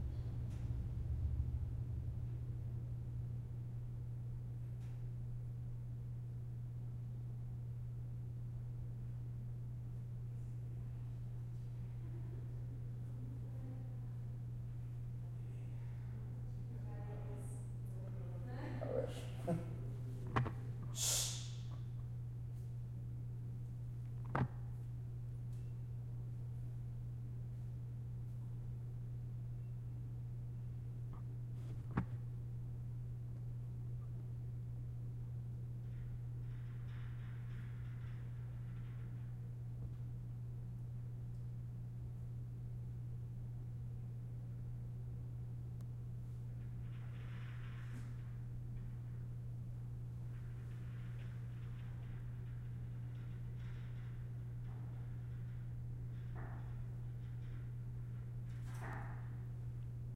Elevador hall Roomtone #2
Elevator, hall, room-noise, room-tone, roomtone